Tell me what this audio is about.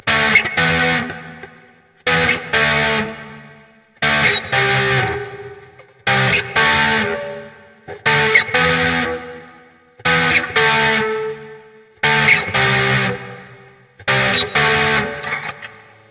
freesoundguitar1phase
Segments of rhythm electric guitar at 120bpm by request. File name and tags indicate processing or lack there of. Segments recorded on Strat clone with Zoom 3000 processor without a pick.
electric, guitar, 120, raw, phaser, bpm, rhythm